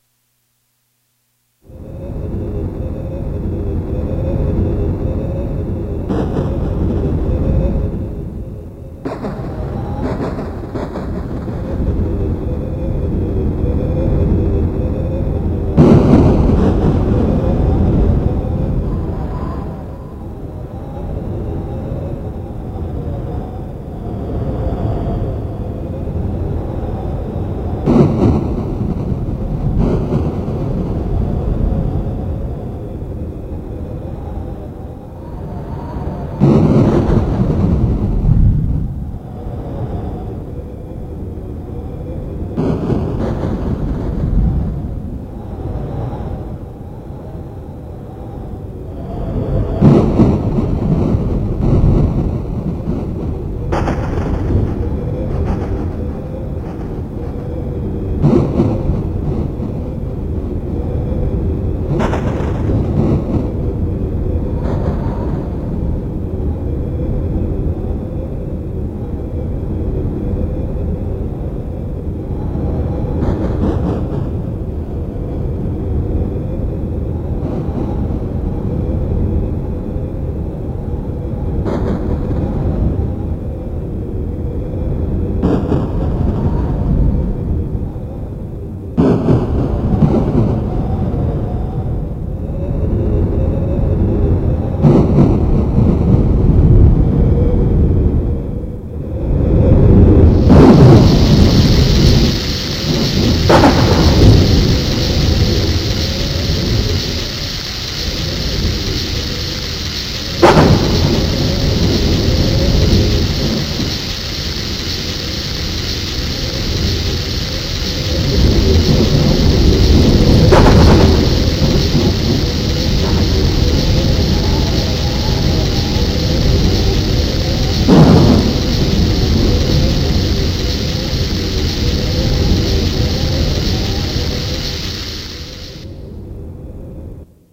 A digitally created thunderstorm using a Yamaha keyboard. The clip last for about 2 minutes. The rain isn't heard until the end and doesn't start until like a minute and thirty seconds in or so and lasts for only about 30 seconds.
Recorded with a Yamaha keyboard and Audacity.
My fourth recording.
rain, thunder